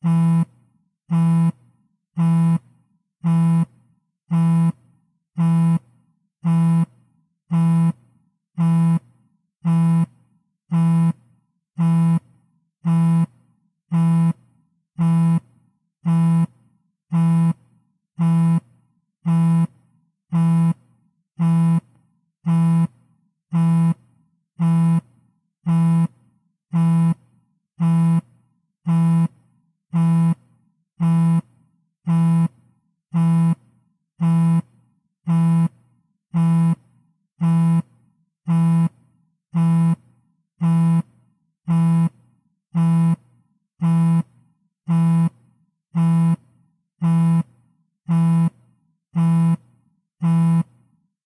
An Iphone vibrating on a table.